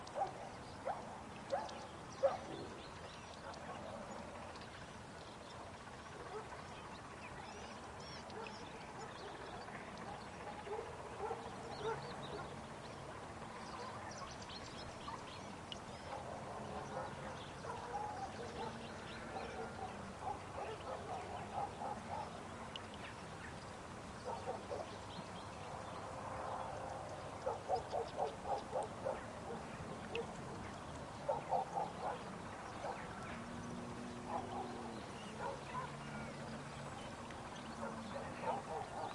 sunny morning sounds in the countryside, including bird calls, distant vehicles, cowbells, etc
cattle, nature, ambiance, birds, autumn, field-recording